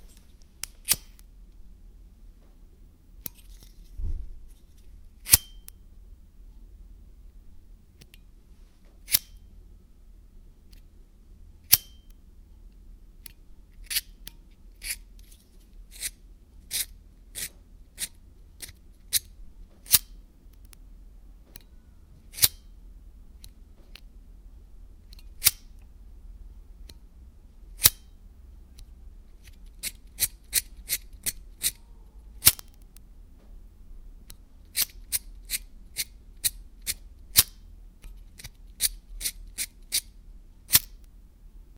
cigarette lighter
Lights several times, including flint wheel turns to try to get the flint crackling sound.